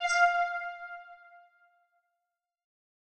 Simple free sound effects for your game!
blip, effect, game, sfx, sound, sound-effect, videogame